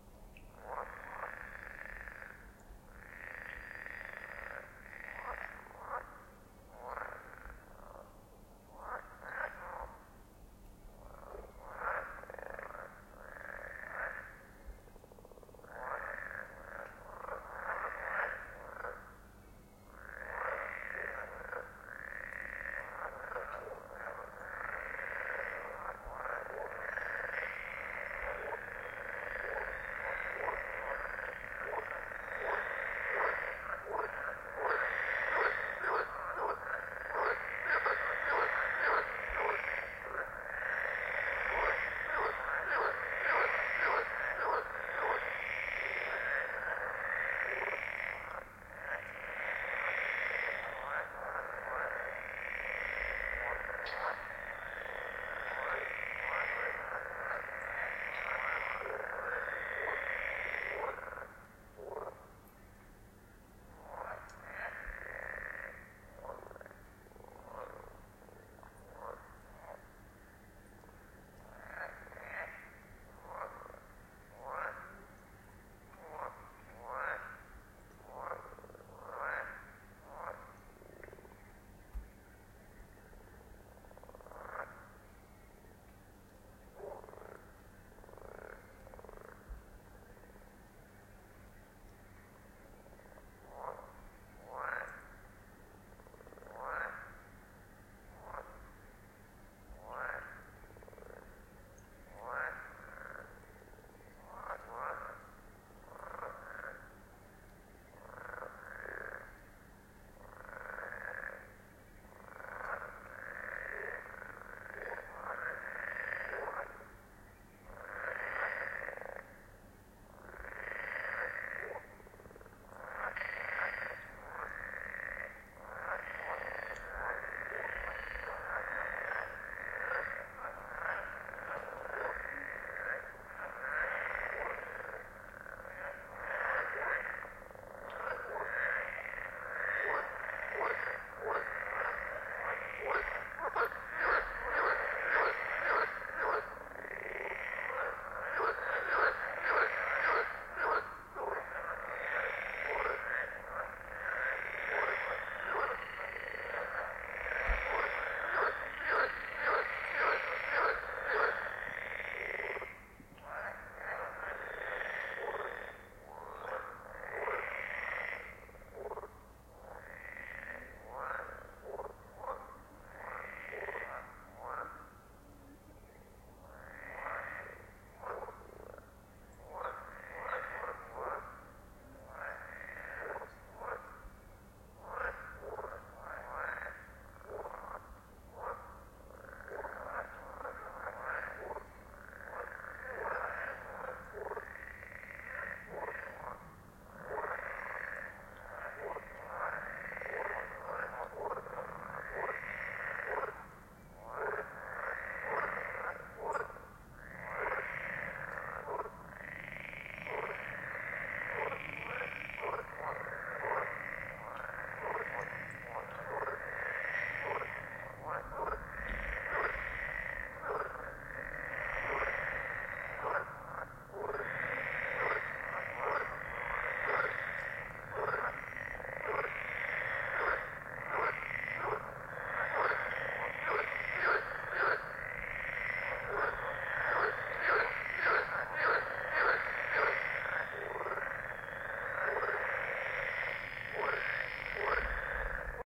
Sound sample, taken during night time near a countryside pond. Frogs at their best. Some mosquitos and environment sound are also there.
Sample was taken using Rode Stereo VideoMic PRO.